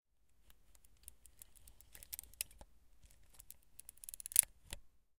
pentax me - film wind 04
Winding the film of a Pentax ME SLR camera.
camera, camera-click, click, manual, pentax, pentax-me, photo, photography, picture, shutter, slr, slr-camera, taking-picture